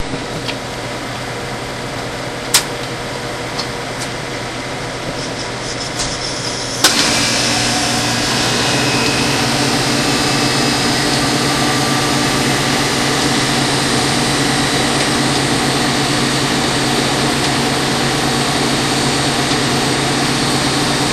2 of 2 variations of recording a slurpy machine in action with my trusty DS-40 in a convenience store.
field-recording, interior, machine, store